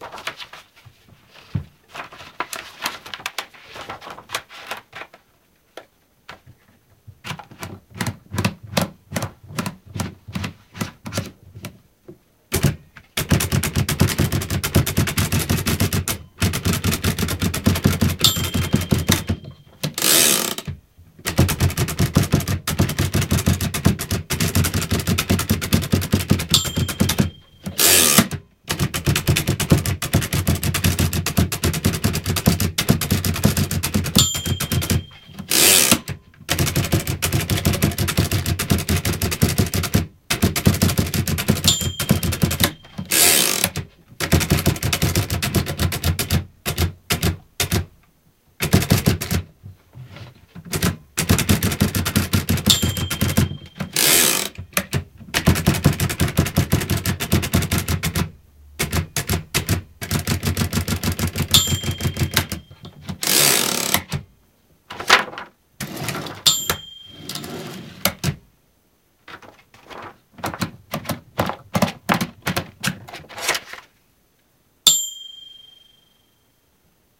picht-type-writer
Sound of Pitch's typewriter for the blind. Typing, noise of paper and bell are recorded.